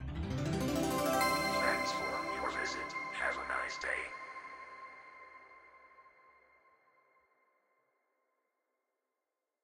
A robotic announcement informing the shop owner that a customer has left the shop.
bell, door, doorbell, exit, futuristic, robot